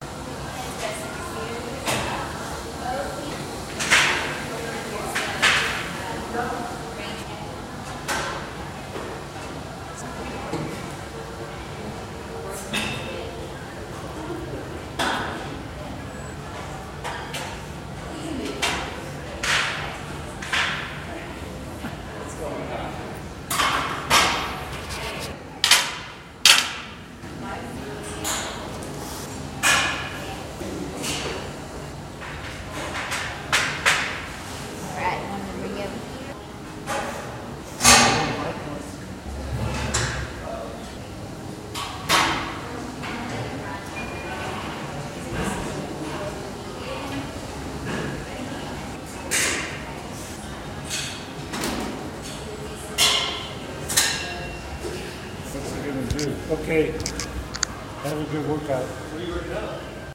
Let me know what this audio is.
Gym / Fitness Facility Ambience
Cardio machines and free weights in use, lots of metal on metal clunking med walla fm conversations